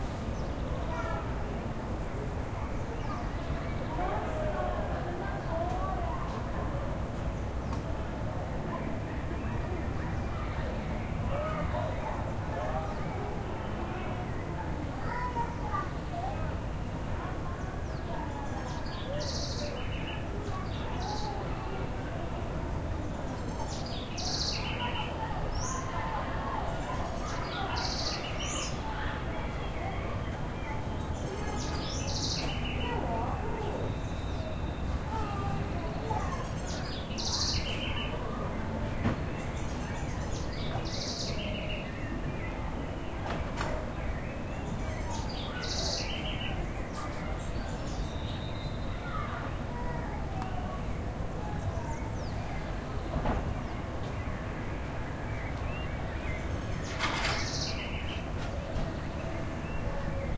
ambient sound street outside
ambiance, ambience, ambient, atmosphere, background, background-sound, field-recording, general-noise, noise, outside, sound, soundscape, street